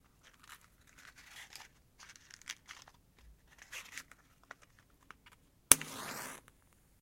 opening the match box and lighting a match
mic-audio, studio